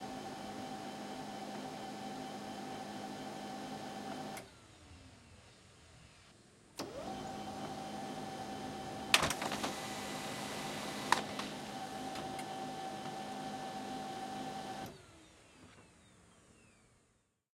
REcording of a laser printer
photocopier, print